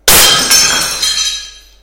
40x40cm WindowGlass Carpet 2
Sound of breaking 40x40 cm window glass above carpet.
breaking, glass, window